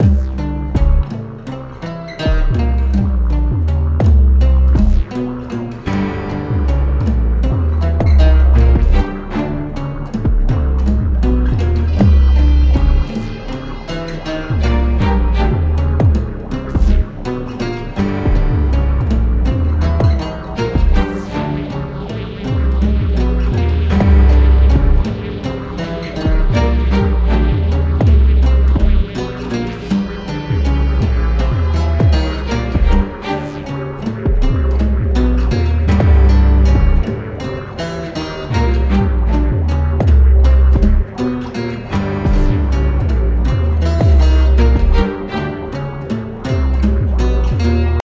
Thanks, and have a nice day! I also added some sweet FX, too! :P
Sound Count: 37
Tempo: 120 BPM
Victornatas, haunted, thrill, creepy, suspense, combination, horror